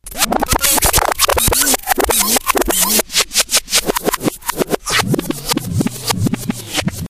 A recording of a vinyl record pulled backwards after playing a hip hop beat.
beat, turntable, kick, dj, hip, hop